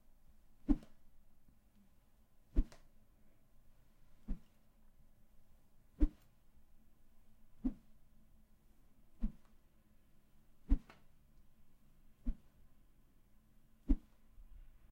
Multiple Swooshes
Multiple speeds/variations of the swoosh that accompanies a human punch.